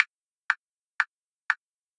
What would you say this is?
LECOINTRE Chloe son1wav
This synthetic sound represents the sound of a finger snap. It is a sound repeated several times to create the illusion of a real finger snap.
fingersnap, snapping, hands, fingers